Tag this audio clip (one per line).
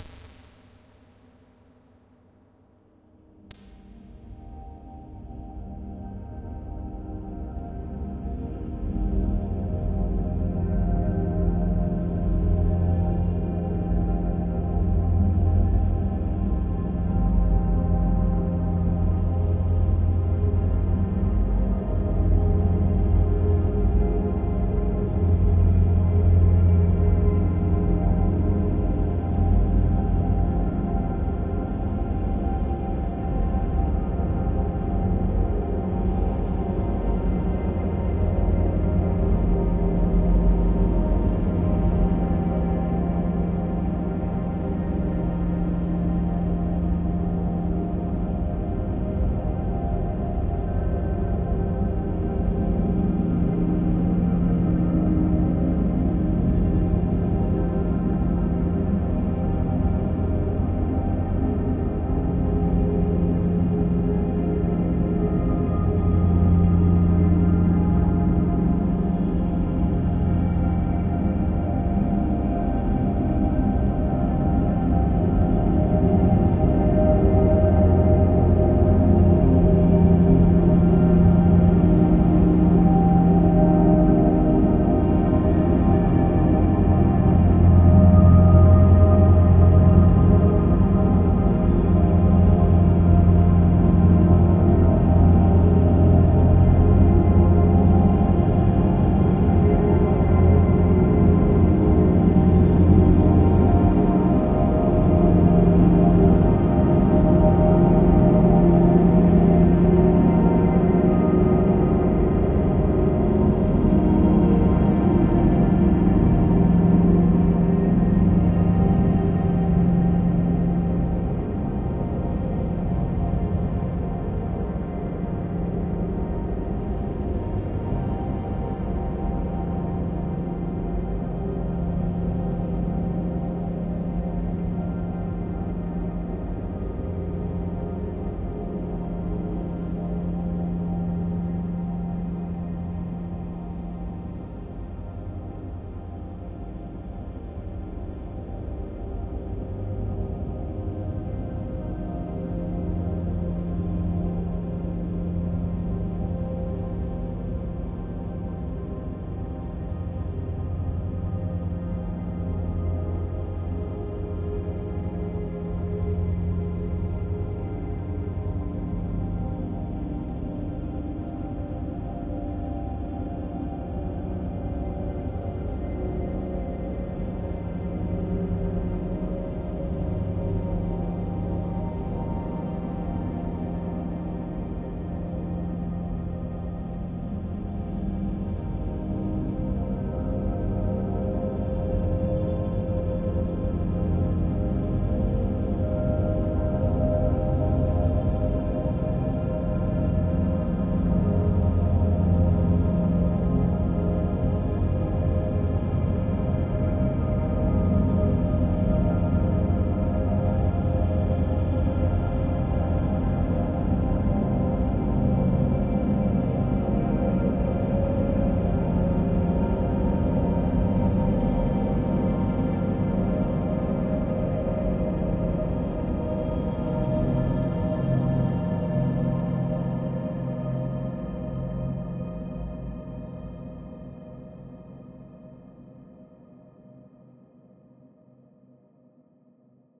divine
smooth
dreamy
evolving
pad
artificial
soundscape
ambient
drone